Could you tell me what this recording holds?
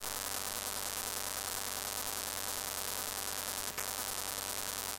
Electromagnetic CPU sound
This is an electromagnetic sound of an UbiCA Lab (UPF) robot CPU. This robot is able to take inventory of a shop without the need of any prior knowledge and with the minimal set of resources.
cpu, electromagnetic, retail, robot, ubicalab, upf